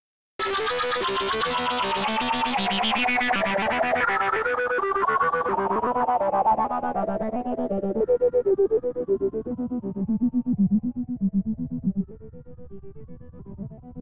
modulated lead synthesiser
electronica, soundscape